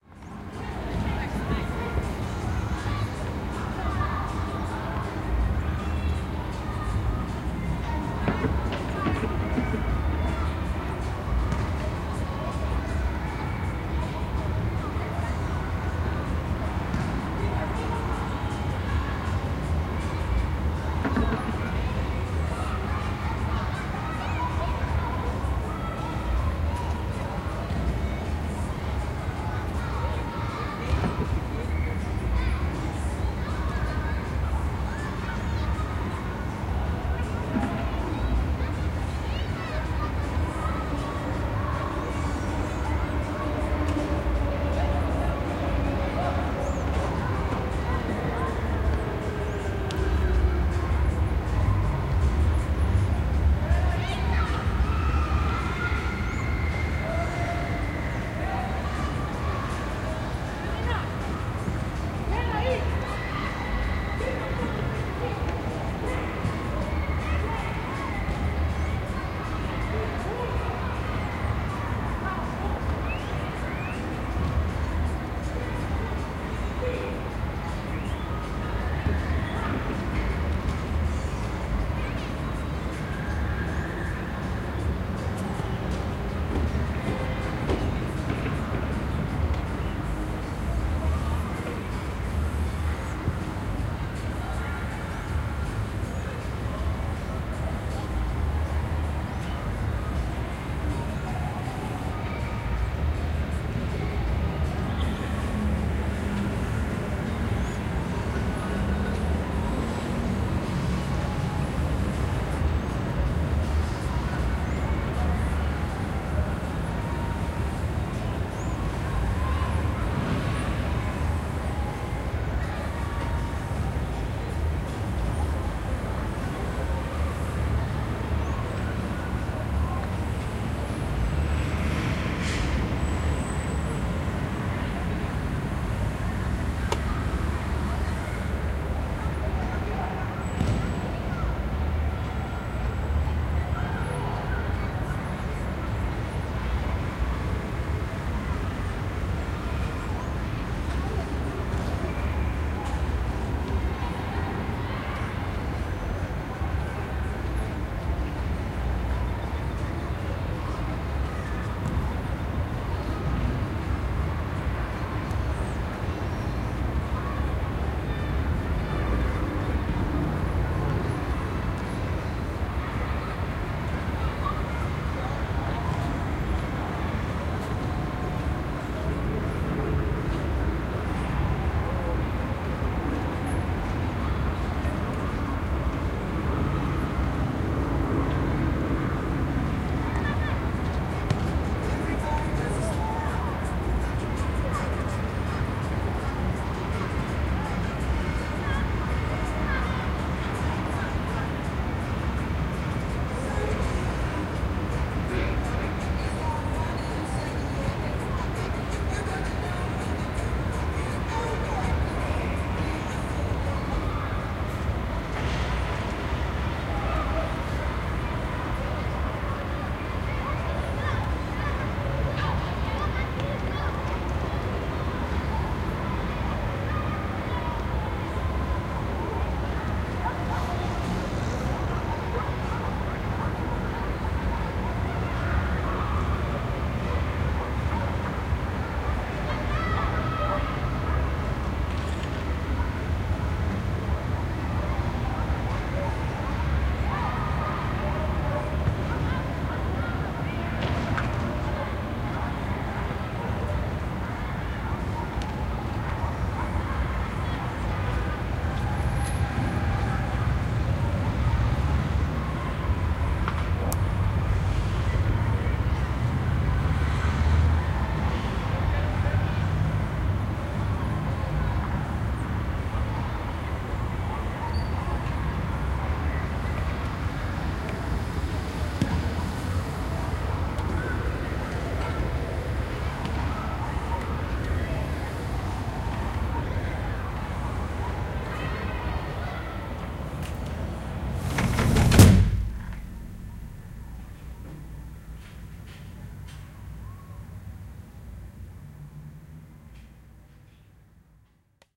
Field Recording Downtown São Paulo
Recording done at 20/05/2011 at downtown São Paulo, from a window in 14th floor of a building. Next to a school with children playing. Next to a car with music playing loud. Recorded with zoom H4n - built-in mics.
alreves, ambience, Brazil, Children, City-ambience, field-recording, Music-background, programa-escuta, Sao-Paulo, stereo